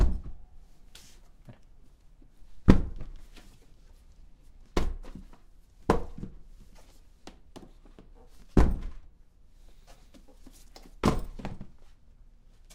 Things falling on the floor
falling, floor, things